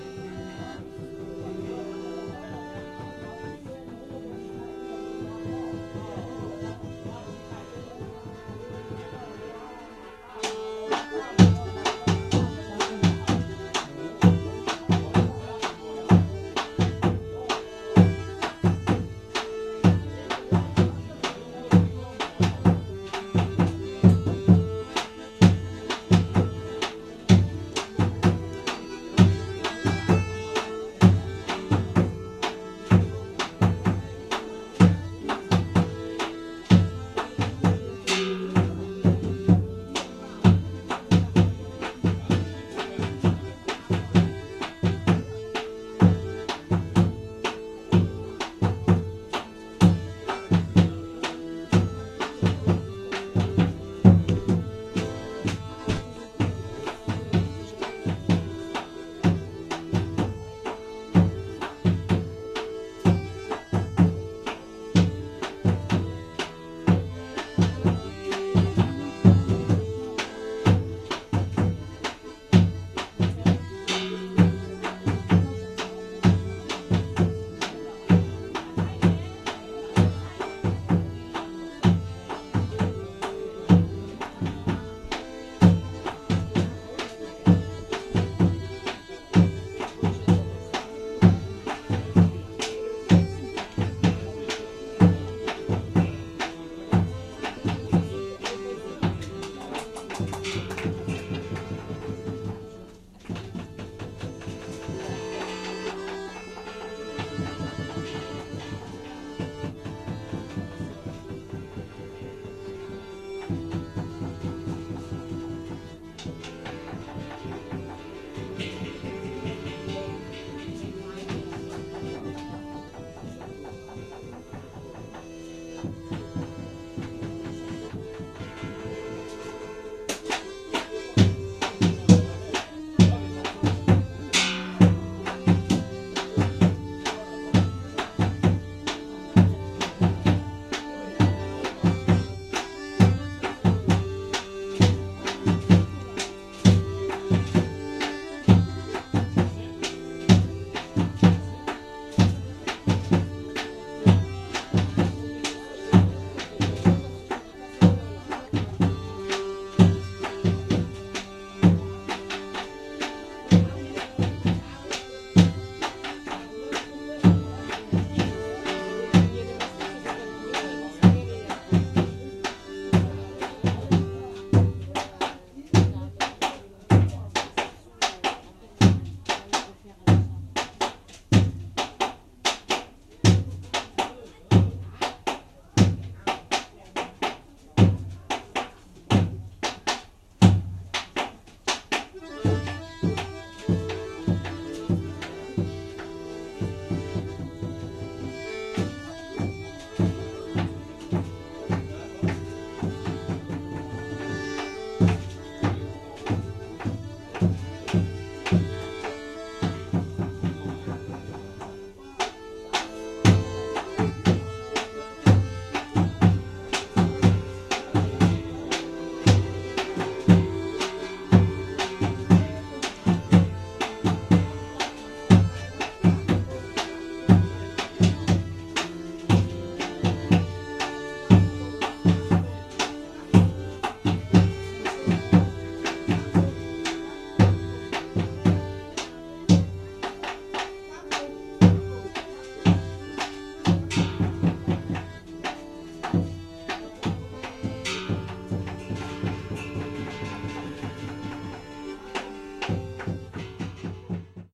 BR 038 VN ethnic
North Vietnamese ethnic music.
Villagers perform north Vietnamese ethnic music, songs and dances.
Recorded in September 2008, with a Boss Micro BR.
ambience, dance, drums, ethnic, Field-recording, gong, instruments, music, North-Vietnam, people, Vietnam, village, voice